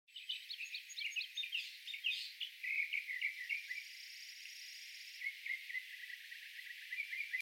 This is a sound clip of birds edited so the birds sound more clear.